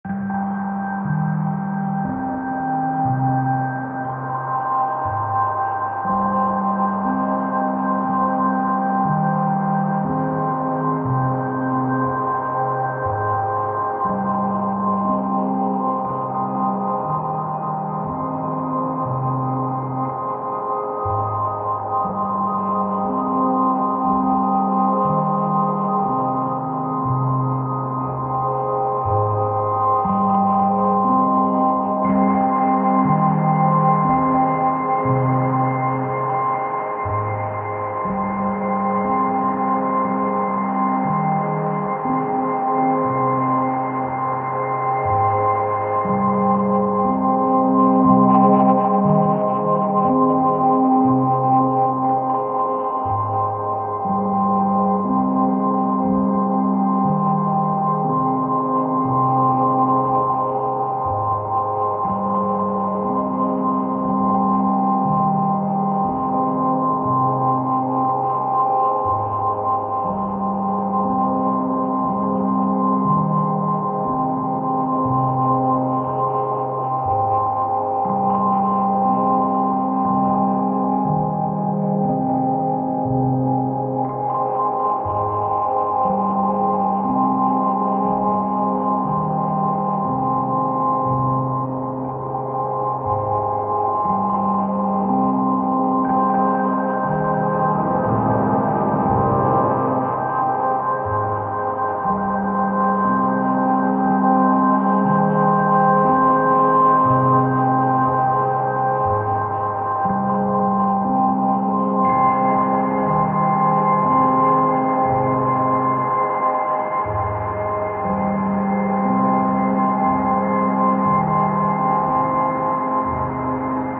Forgotten ChildHood Memories.wav60BPM
Very somber and dark cinematic sound made in ableton.
soundscape, atmosphere, sound, anxious, ambient